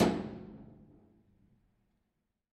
EQ'ed and processed C1000 recording of a metal trolly hits. I made various recordings around our workshop with the idea of creating my own industrial drum kit for a production of Frankenstein.
metal, percussive, hit, high, ting, pitch, drum, metallic, percussion